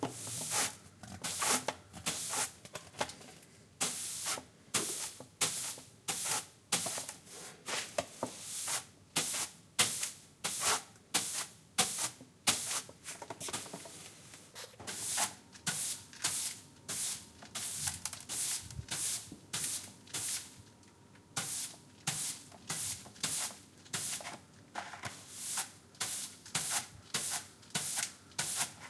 20110710 floor.brushing
floor brush in action. PCM M10 with internal mics